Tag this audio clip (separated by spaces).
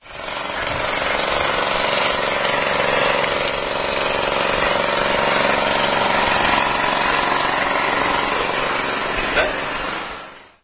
noise street